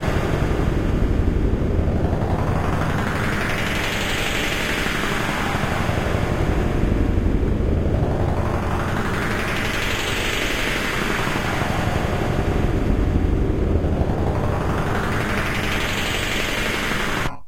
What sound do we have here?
Weird industrial noise.
factory, industrial, machine, mechanical, noise, weird